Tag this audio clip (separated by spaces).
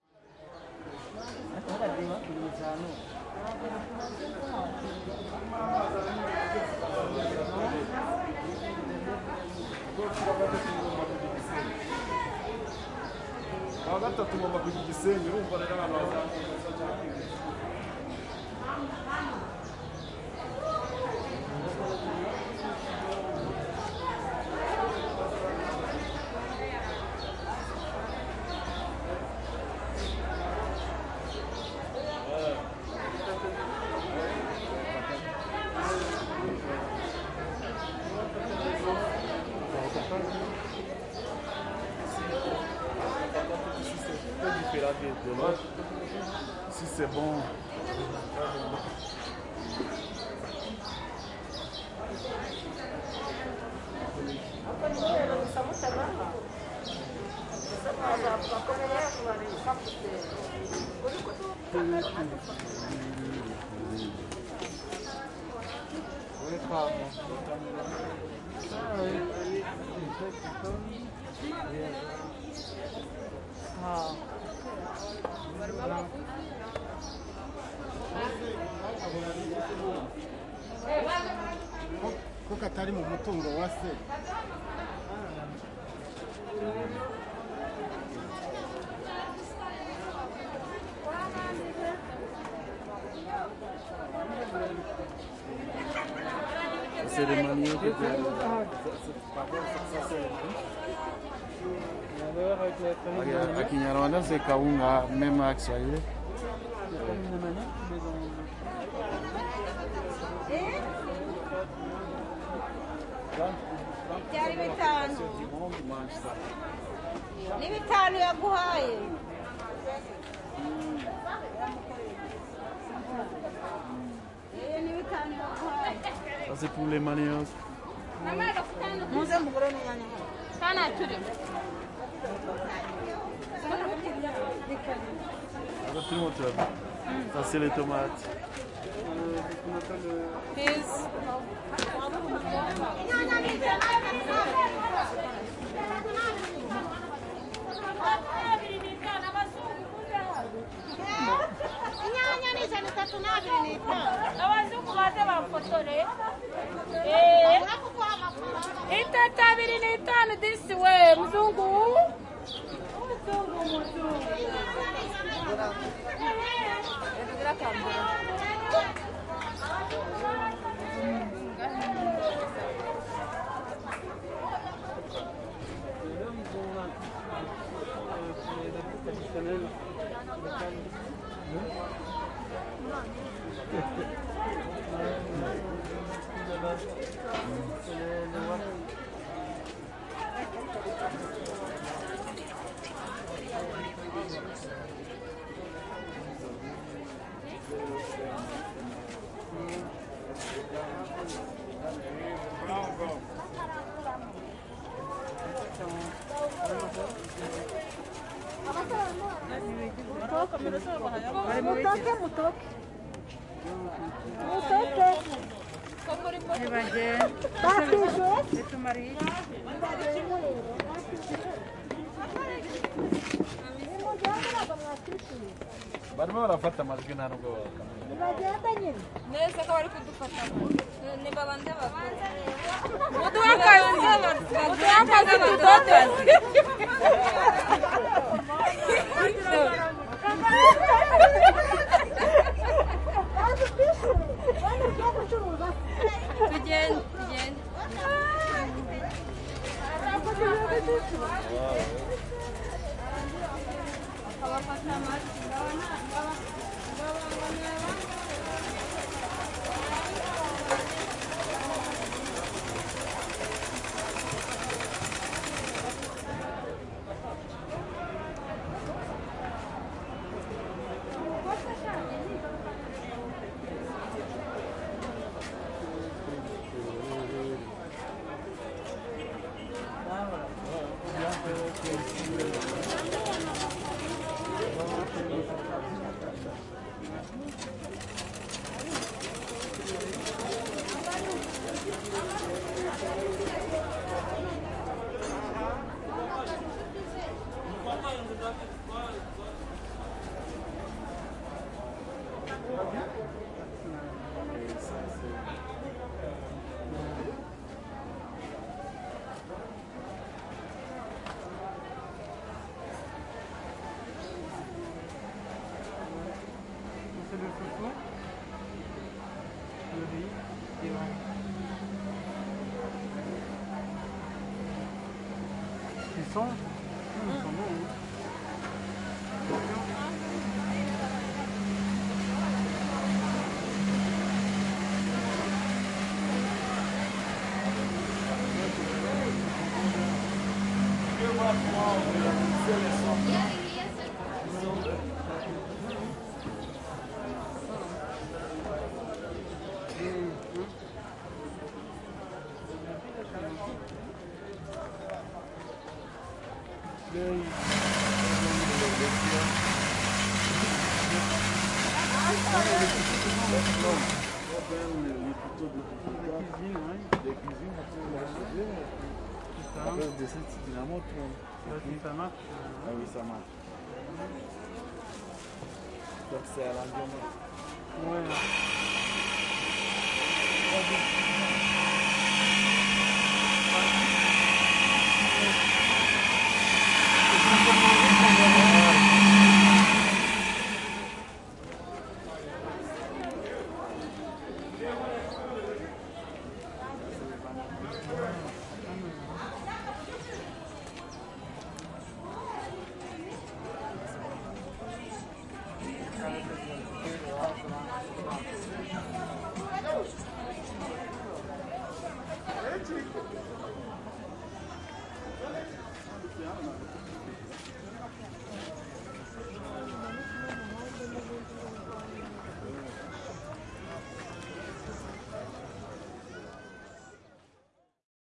city rwanda voices market ambience people field-recording